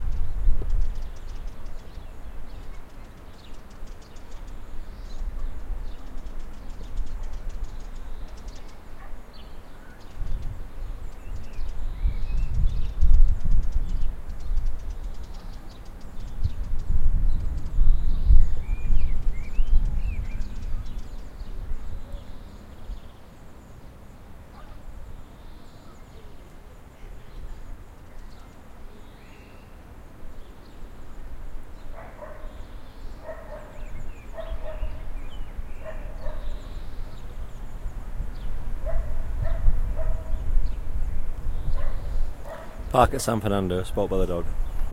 Pargue Ruis de Alida
Recording of the park near Castillo de San Fernando. Recorded with a Zoom H5 around 12am on 5th April 2015.
Alicante, Castillo-de-San-Fernando, Dog-barking, Spain, Park, Outdoors